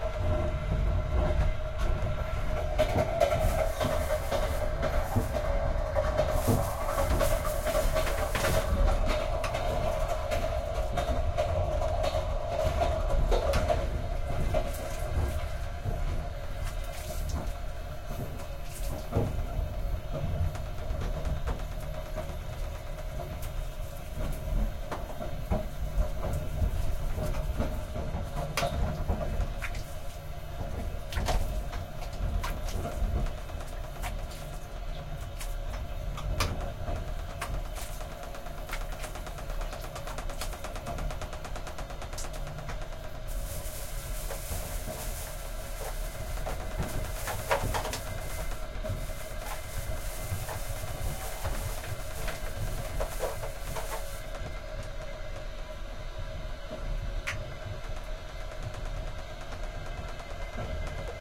train, toilet, wash, Moscow to Voronezh
WC (toilet) interior washing sounds
rail, rail-road, railroad, railway, train, wagon